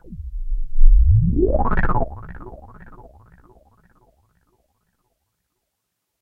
moaning, slow filter sweep on 2 osc a whole step apart. elektron sfx60's SWAVE ENS machine. besides the filter base and width being swept, the pulse width of the osc is also being modulated. this sound is soloed from 060102yohaYohLoop128steps117bpmMulch